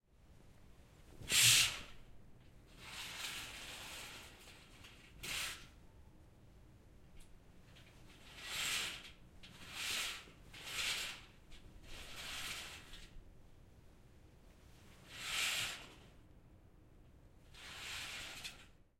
Recorded with a zoom H6. Opening and closing curtains in different ways.